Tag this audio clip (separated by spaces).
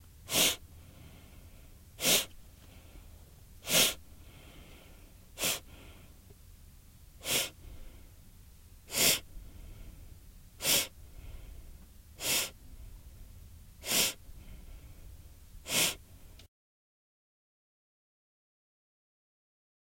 OWI film nose sniff